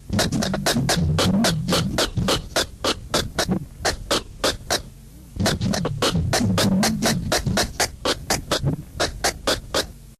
4track Tapeloop speedmessed
warped tape loop with the recording of a crappy hi-hat sound from a cheap keyboard, the tape loop plays twice
warped-tape, warp